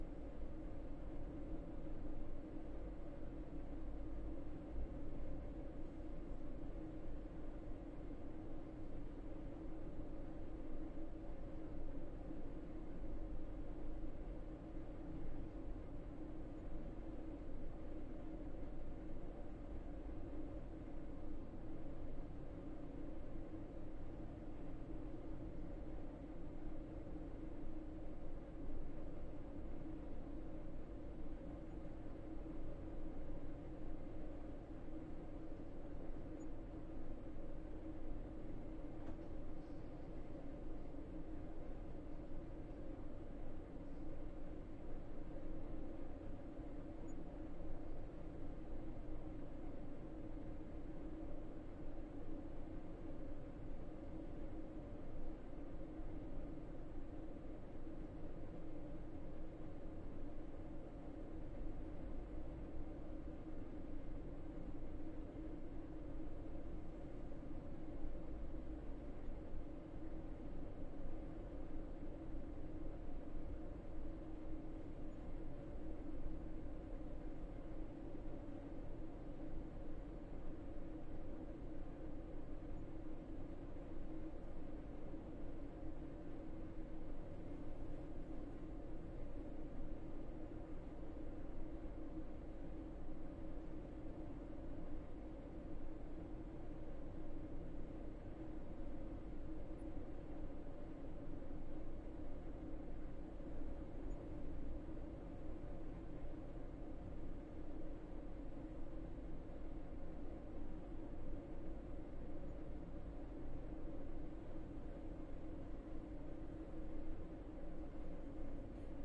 Ambience Hotel corridor Jacklin disk 01.A5
Recording from top floor clarion hotel oslo. Recording is in the corridor of the hotel and i have been useing two omni rode mikrofones on a jecklin disk. To this recording there is a similar recording in ms, useing bothe will creating a nice atmospher for surround ms in front and jecklin in rear.